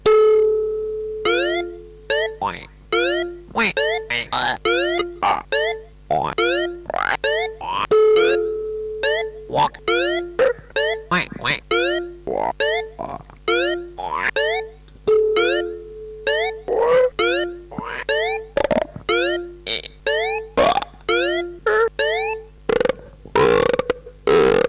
Frog voices when making...hm...small frogs.